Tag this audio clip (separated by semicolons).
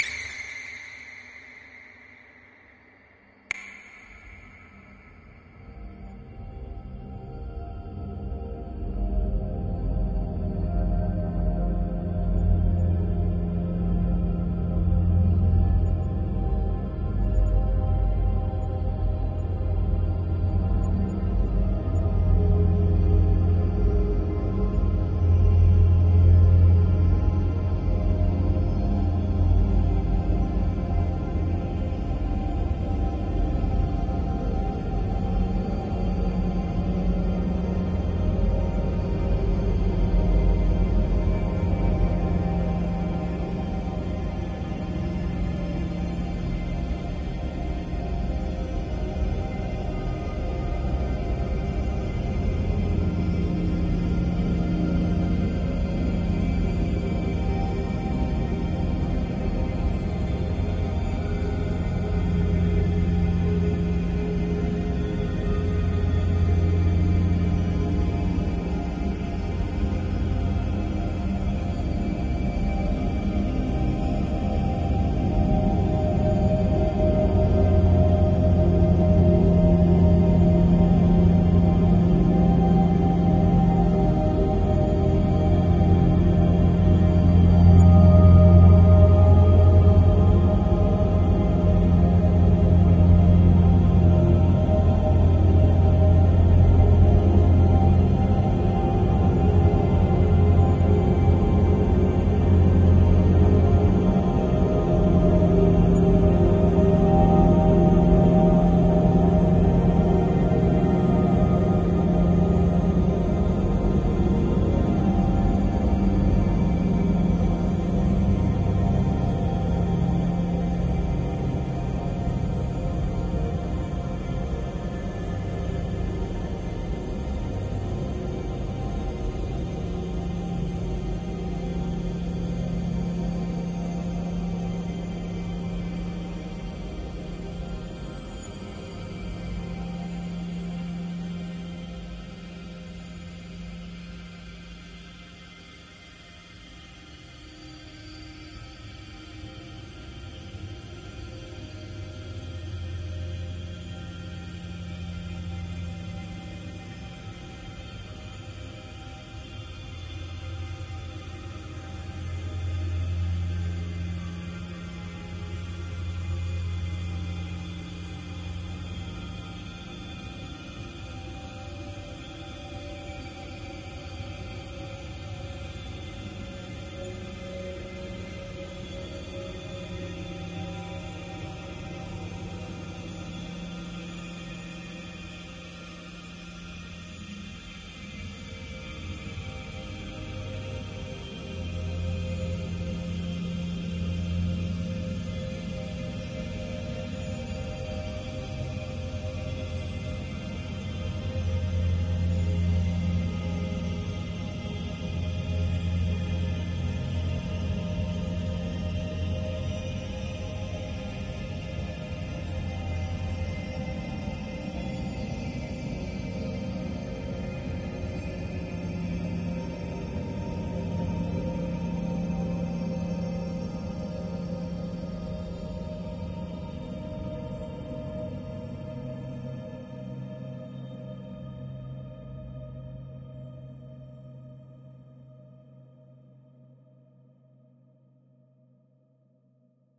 ambient; artificial; divine; dreamy; drone; evolving; multisample; pad; smooth; soundscape